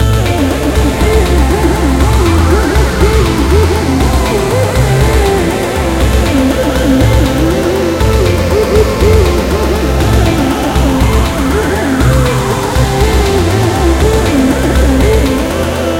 spacejamloop2of3
psychadelic but slightly mellow and spaced-out alien music. Three loops in the key of C, 120 bpm
alien, cool, galaxy, game, loop, mellow, music, pyschadelic, space, video, weird